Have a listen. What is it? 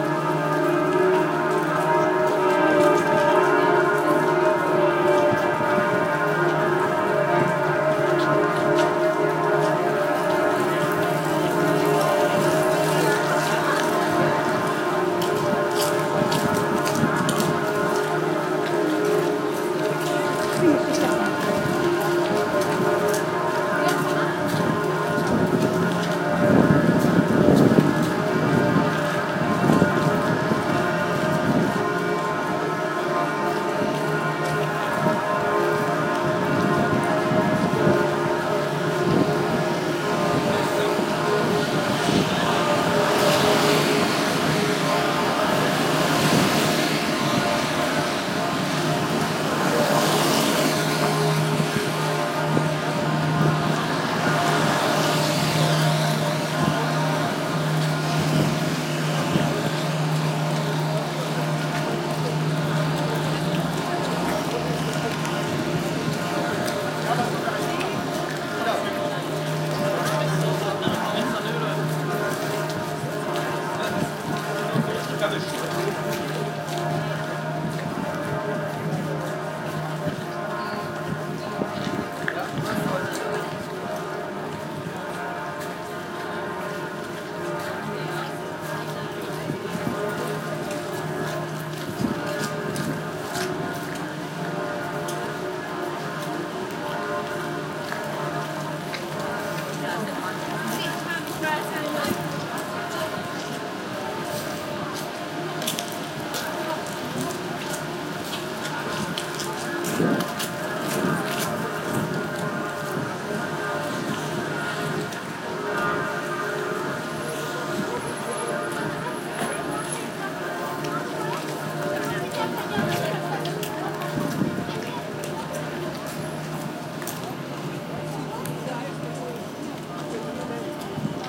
Berlin Ku'damm bells, 7-Jan-2011
kudamm, berlin, bells